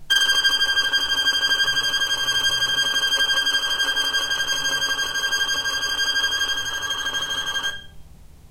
violin tremolo G5
violin, tremolo